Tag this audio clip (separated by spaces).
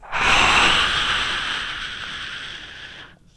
animal cat dragon processed voice